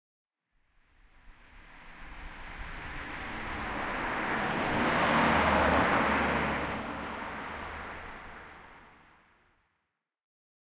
car coming 3
car outside circulation
circulation, outside